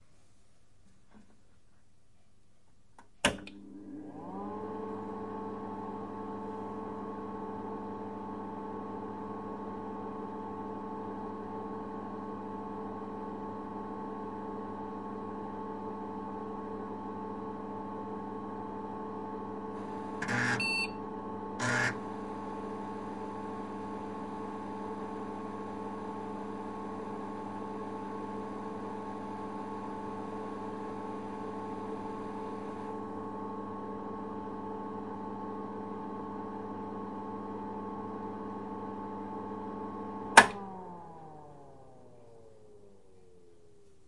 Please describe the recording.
Old PC startup, idle & shutdown
The wonderful sounds of a 1981 IBM PC Model 5150 being turned on, the 5.25" disk drive checking if a diskette is inserted, the PC emitting its idle hum, and finally being turned back off.